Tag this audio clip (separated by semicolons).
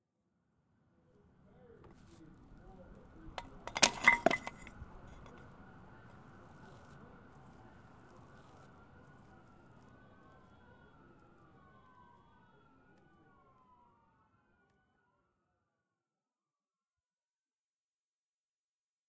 ambient; crash; foley; outdoor; window